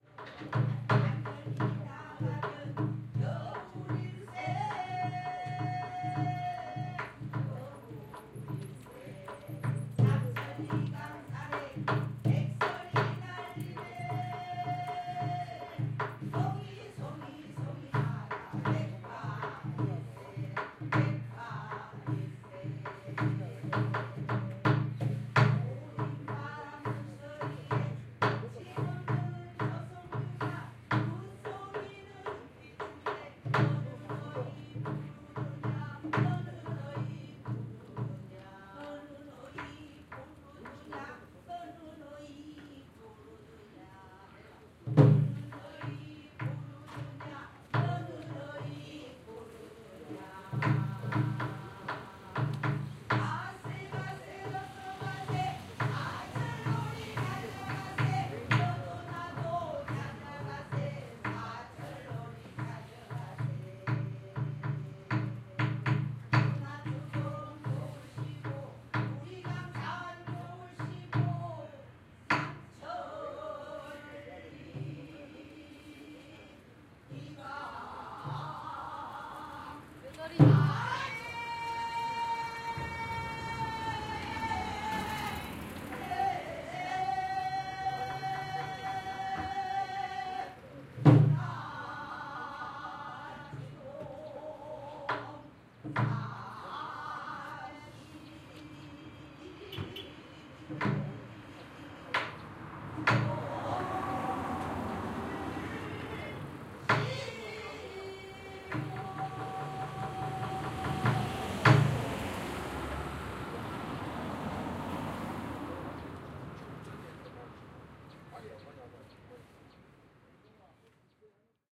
As I walk around the streets of Gwangju, I pass by a small house. inside there are some women singing and hitting the drum. Some ancient Song.
Field-recording, singing, voice, city, korea, shamane, street